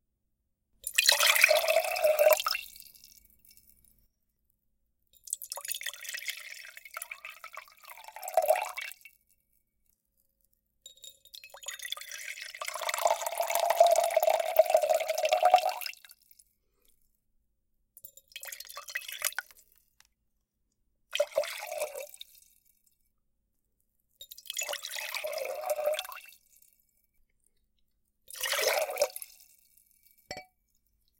pour water in pewter mug
Pouring water in a pewter mug several times. This could be wine or any other liquid. Recorded with AT3035.
cup, drink, liquid, mug, pewter, pour, pouring, water, wine